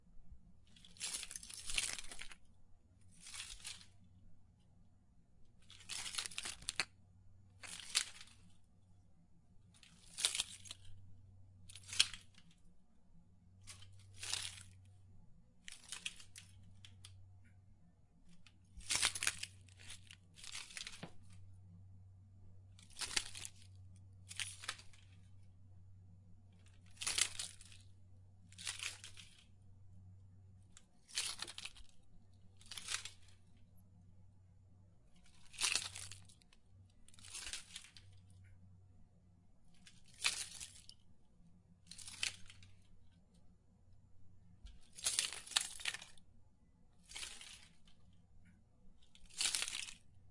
Keys Jingle 02
keys, jingle